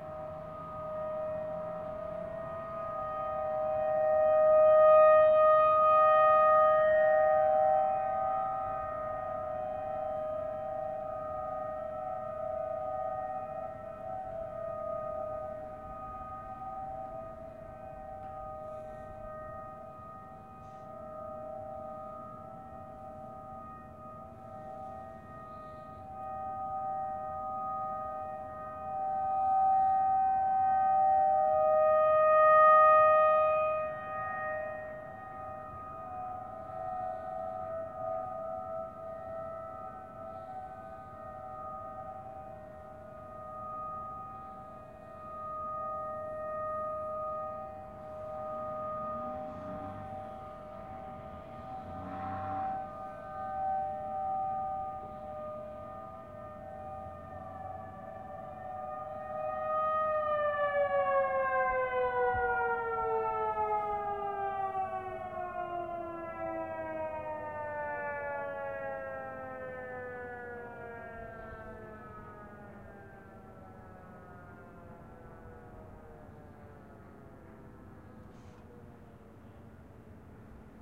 This Tornado warning siren is enough to scare you. Although the recording doesn't have the cleanest start it runs long enough until the siren fades off to be a good sample.